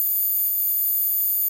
A Casio CZ-101, abused to produce interesting sounding sounds and noises
synthesizer, cosmo, digital